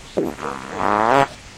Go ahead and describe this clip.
nice fart
fart poot gas flatulence flatulation
aliens, art, beat, explosion, flatulation, flatulence, frog, frogs, laser, noise, poot, snore, space, weird